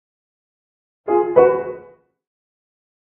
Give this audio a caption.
Message Notification created with a piano.